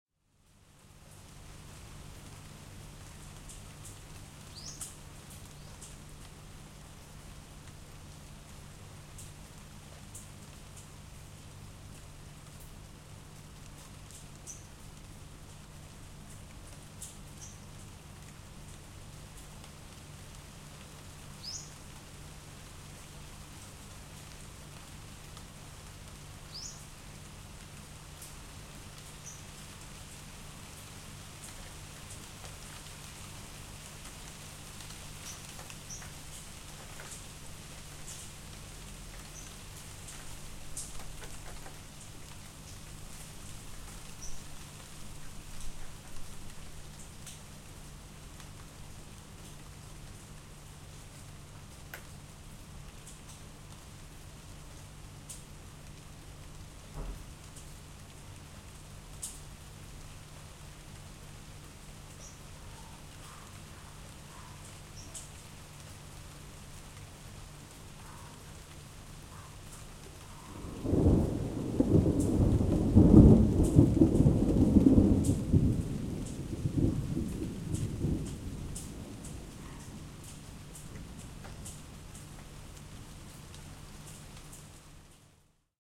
Raining in Bangkok 20180916-1
Recording the rain and a thunder inside my house.
Microphone: 12Guage Black212
Preamp: Focusrite Scarlett